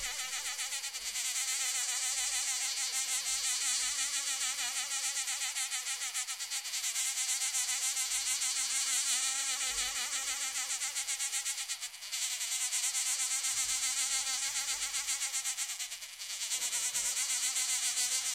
Wasp Buzzing Building Nest (Sceliphron)
This kind of wasp is building individual nests made out of mud. Then they lay an egg inside of each one and brings a reserve of food in it for the future larvae.
This is the sound of the female wasp working on its mud coccoon.
Recorded on a Tascam DR-40 with a Rode NTG-1
field-recording, nature, insects, buzz, fly, mosquito, wasp, hive, flies, bee, buzzing, swarm, bees, insect